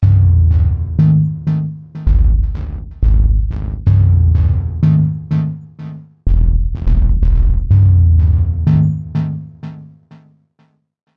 Techno Synth Delays
Sort of a minimal techno synth/bassline.
dub-techno, dubtechno, electro-bass, synthesized, dub, synth, bassic, minimal, bass, minimal-techno, bassline, techo, electronic, ambient, bassy, house